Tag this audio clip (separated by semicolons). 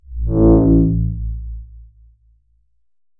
computer; weird; freaky; resonance; sound-design; digital; splash; typing; beep; abstract; button; alarm; push